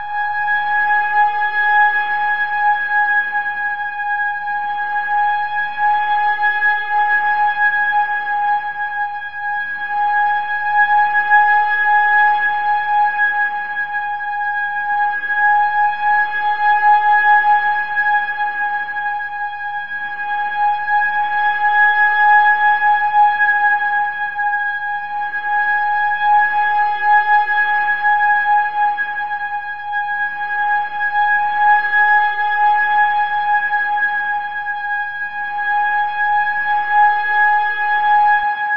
simple drone sound, created by using Ableton's Operator plus some Granulator and effects.
It can be used as a subtle background noise to make your scene tenser or etc.
you get it
experiment with volume automation